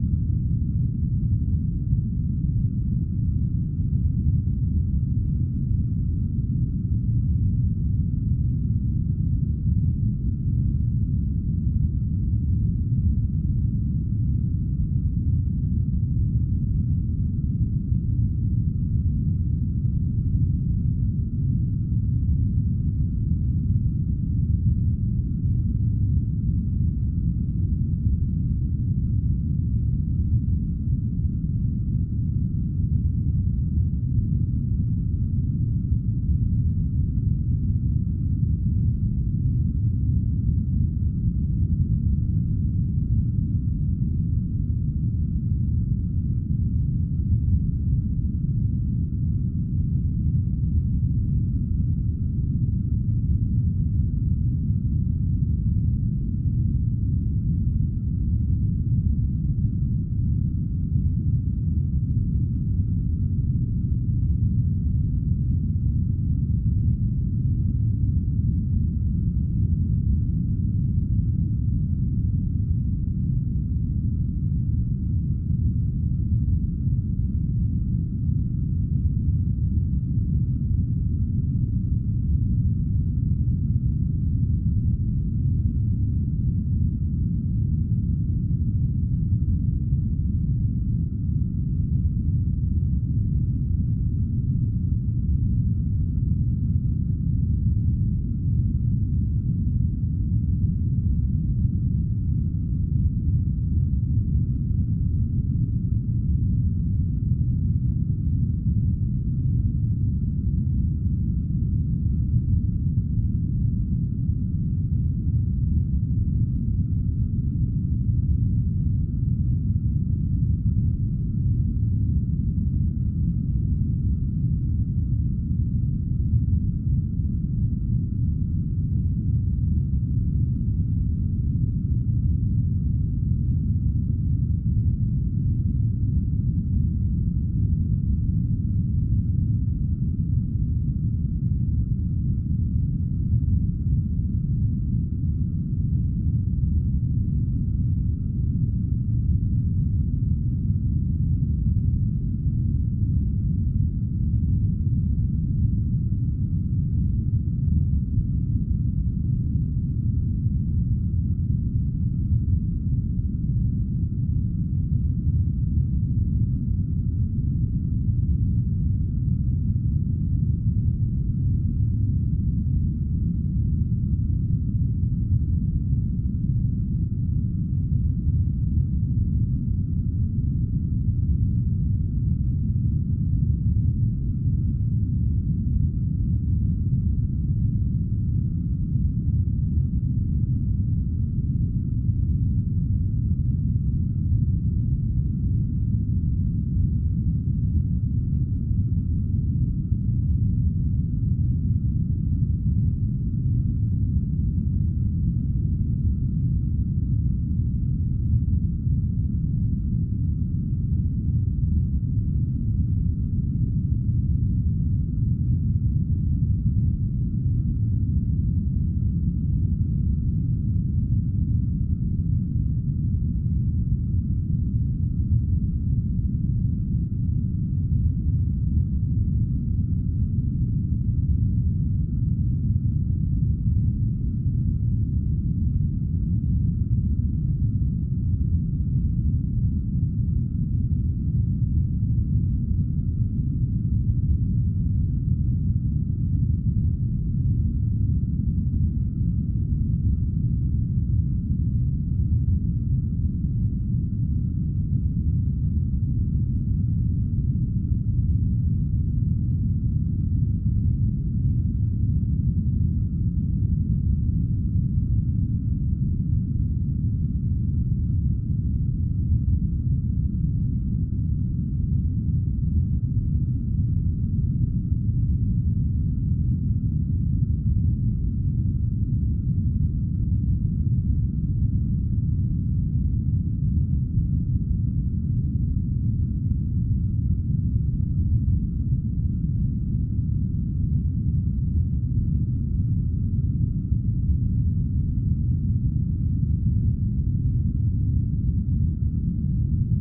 An ambience made from my gas flame sound effect and equalized to create this seamless spaceship ambience.
atmosphere, ambient, sci-fi, soundscape, drone, background, space-ship, background-sound, ambience, ambiance, space